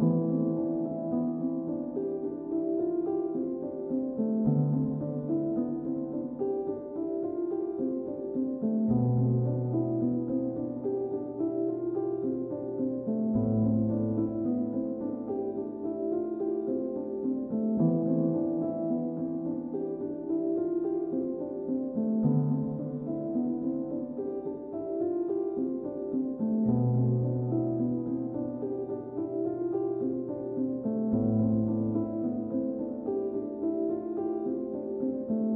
piano pattern 50014 108bpm
piano, old, 108bpm, acoustic, classical